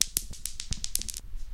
dice roll02
Dice rolled on stone floor. Recording hardware: LG laptop running Audacity software + Edirol FA66 Firewire interface